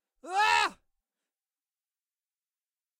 scream3 serles jordi
666moviescreams, scream, screaming, scary